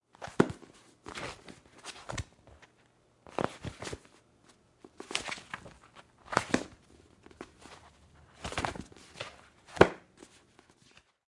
Book movement paper sound
book, books, movement, read